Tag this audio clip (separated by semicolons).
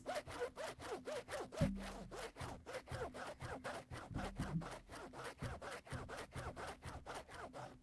CityRings; Rennes; France